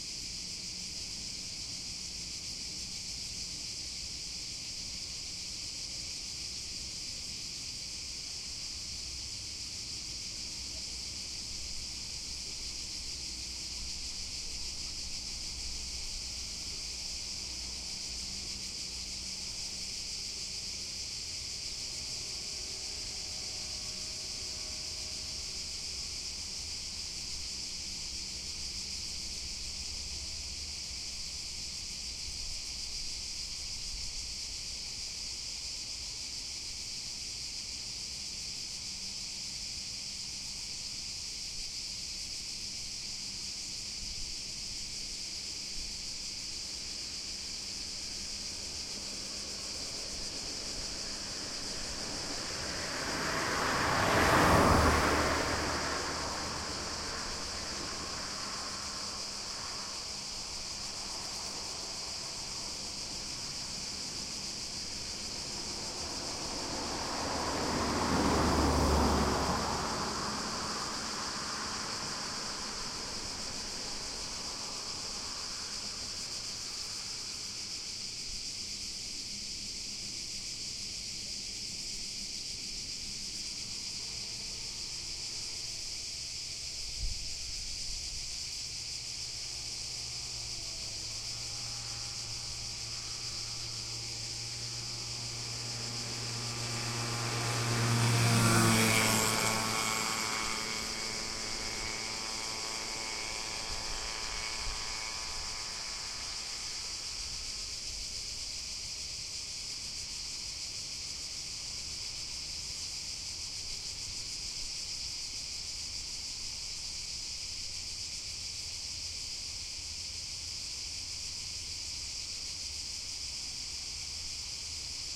130719 Brela SmallRoad R 4824
Surround recording of a small country road near the Croatian town of Brela. It is a sizzling hot summer noon, crickets are chirping, several cars can be heard driving by the recorder, which is situated on the side of the road, facing the road at a height of approx. 1.5m.
Recorded with a Zoom H2.
This file contains the rear channels, recorded with a mic-dispersion of 120°